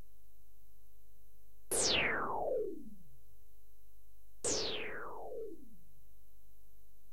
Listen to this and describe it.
nukutusase1 - sleeping gun1
space gun used originally as a sleeping gun in one project. Made with clavia nordlead2